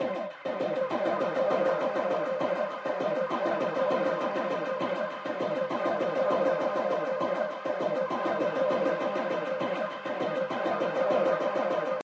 electro percussion
an percussion with an electronic touch.made in ableton